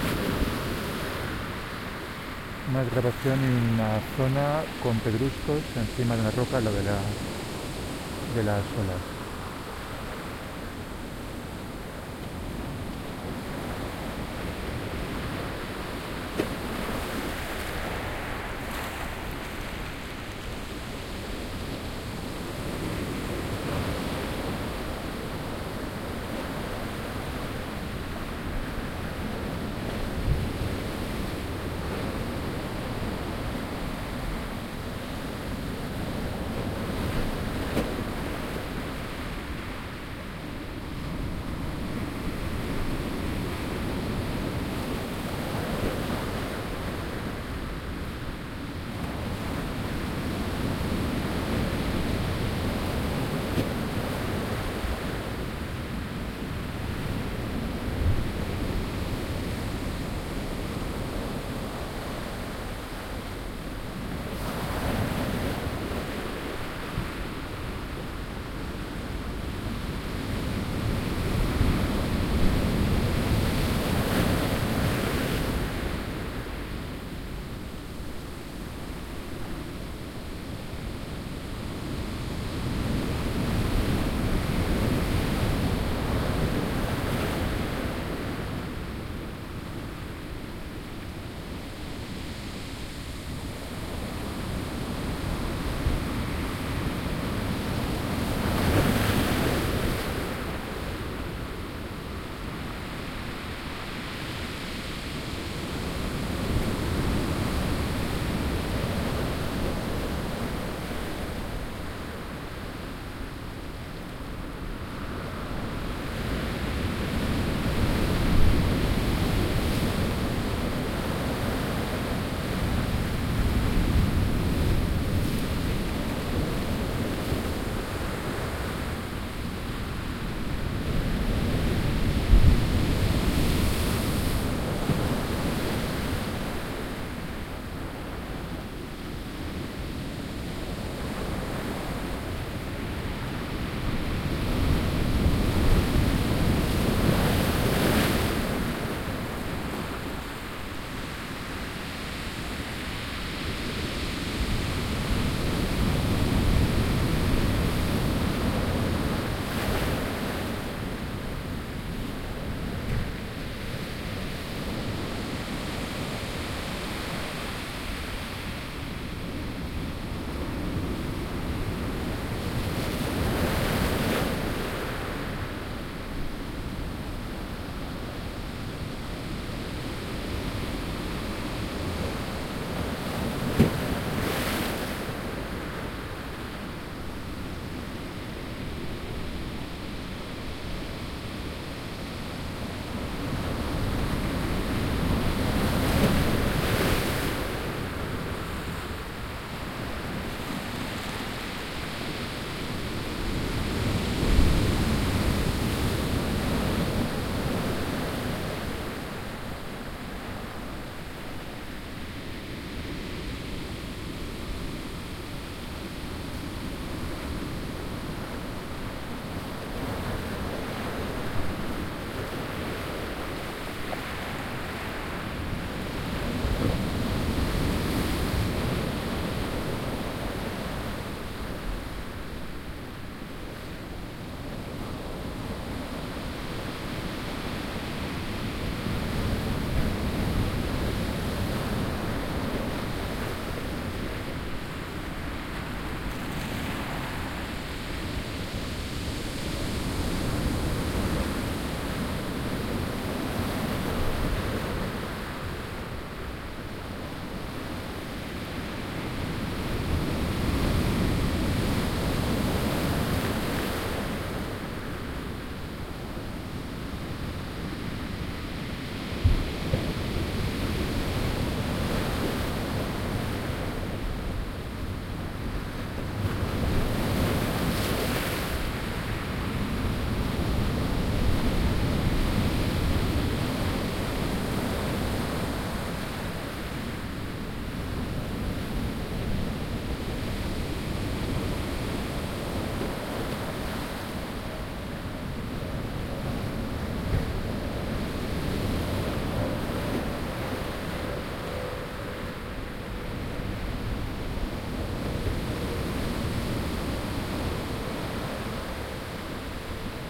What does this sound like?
Quiet day, close recording of the breaking waves.